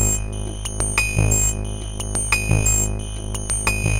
9oBpM FLoWErS Evil Creams - 4
A strange distorted loop with toy piano sounds. Loopable @90bpm.